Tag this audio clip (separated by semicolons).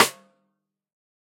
1-shot; drum; multisample; snare; velocity